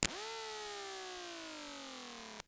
Phone transducer suction cup thing on various places on a remote control boat, motors, radio receiver, battery, etc.
electro, magnetic